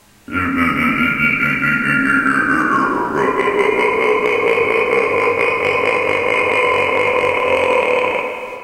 evil laugh
A deep, insane laughter I made
man, dark, evil, terror, scary, thrill, insane, terrifying, threatening, horror, deep, laugh, fear, mad, psychotic, suspense, laughter, human, gothic